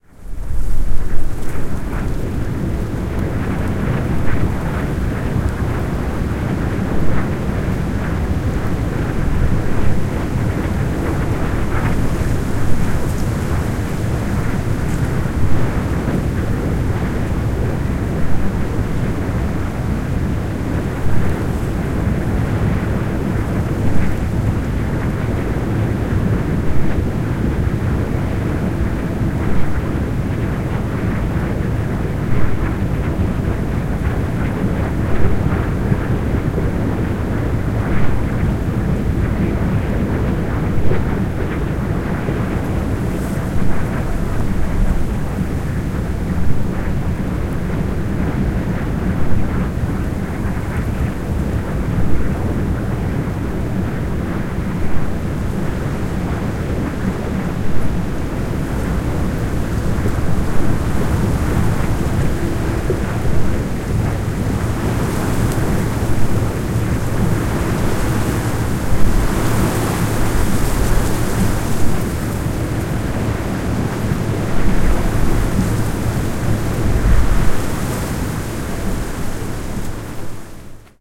Esperance Wind Farm
Esperance was the first place to establish a wind farm in Australia. Consequently this is the sound of a first generation wind generator. This recording was done approximately 40 metres from the wind generator using my Zoom H4 with a Rycote wind sock.